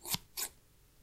Scissors closing and opening.